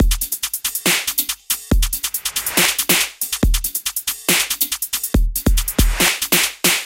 dubsteploop 140BPM 4
snare, kick, shaker, hat, drum, hi, loop, drumstep, dubstep